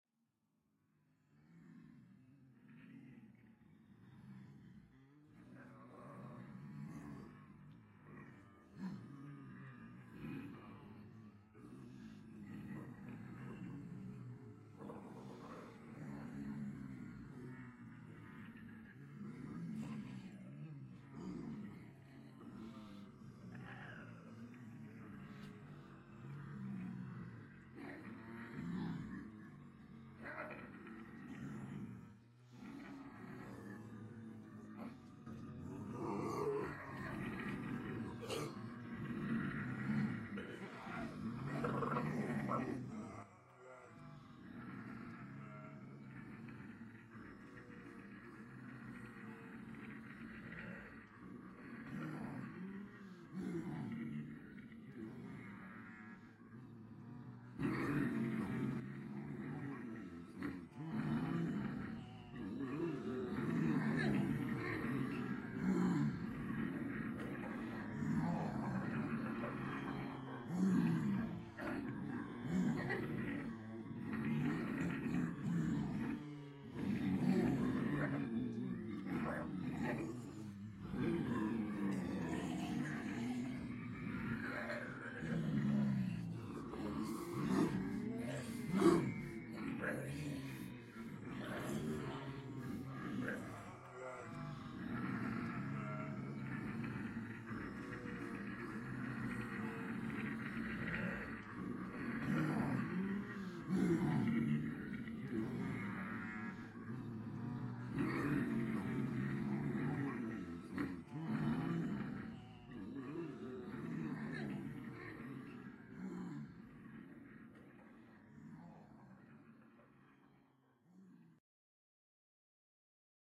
Zombie Group 1C
Multiple people pretending to be zombies, uneffected.
horror, monster, zombie, roar, undead, dead-season, snarl, voice, group, ensemble, solo